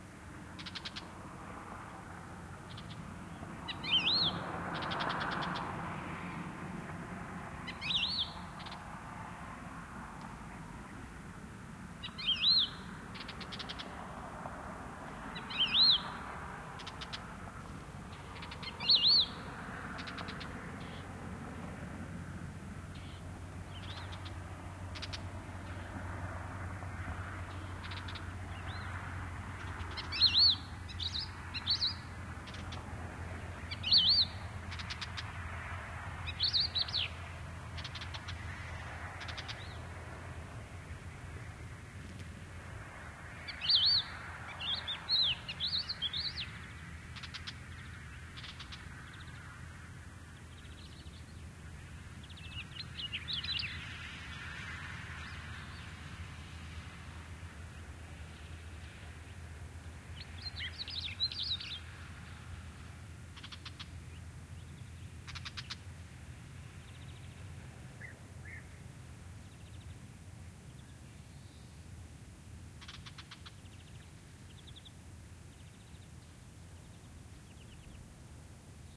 evening ambiance in scrub near Donana National Park, S Spain, including a car passing along a dirt trail and calls from several bird species (Crested Lark, Bee-eater, Warblers, etc). Decoded to mid-side stereo with free VST Voxengo plugin, unedited otherwise